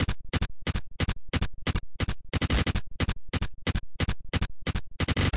distorted percussion loop 180 bpm
180, bpm, distorted, loop, percussion